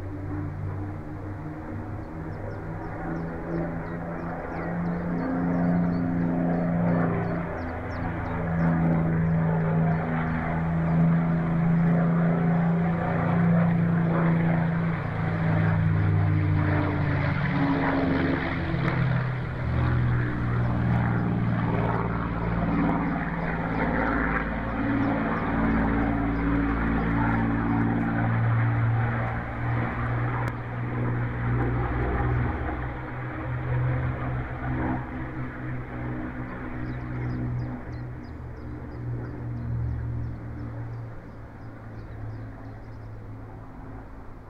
Recording of a spitfire flying by-some bird noises unfortunately but i hope it might be some use